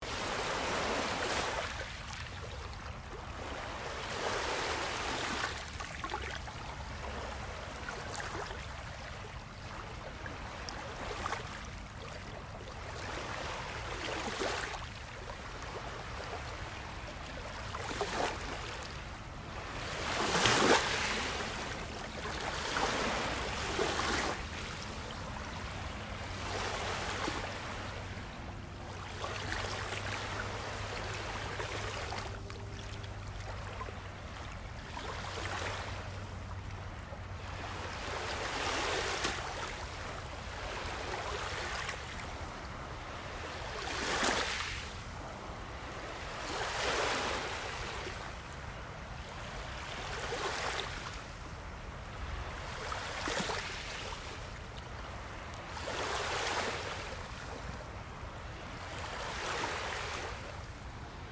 Gentle lake waves hitting the shore.